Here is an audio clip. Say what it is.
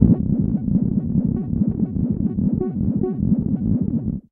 Explor3r Modulated Extreme9 LoPass
Used the Explor3r VST synth to create a chaotic noise sample and put it through a low pass filter. Cutoff was set below 100Hz, low resonance.Using a low pass filter it is possible to obtain some "under water" type sounds. This one still has a few "blips" and is not very convincing.